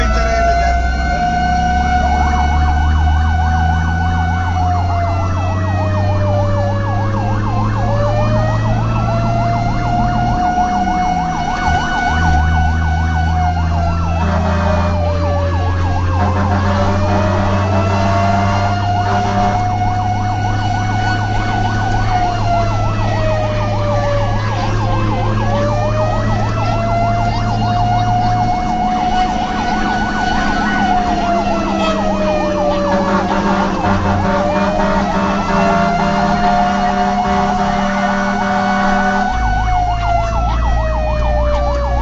Firetruck engine and siren
Real engine sound from inside firetruck while responding to emergency call.